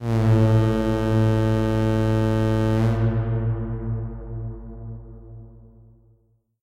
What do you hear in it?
Ship Horn Distant
This is a sound I made in Audacity. I just used a Sawtooth tone and just changed the pitches at the beginning and end. Added some reverb and bass.
sea, ship, horn